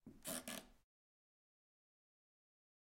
Creaking bed frame
A bed frame creaking back and forth.